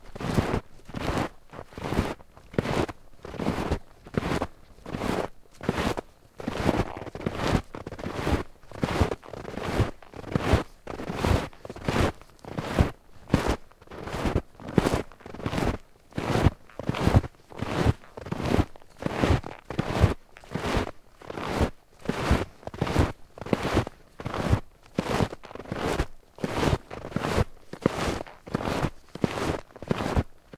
STE-015 snowshoe
Walking in very soft snow while wearing snowshoes.
crunch, foley, snowshoe, snow, walk, winter, geotagged